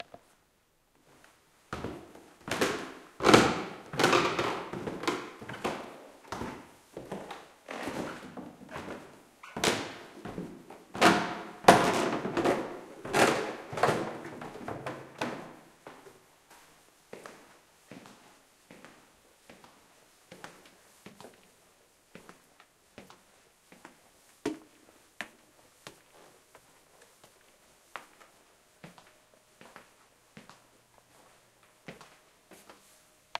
Recording of me walking down a very old wooden staircase and contiue walking through some other rooms with a stone floor and different room sices.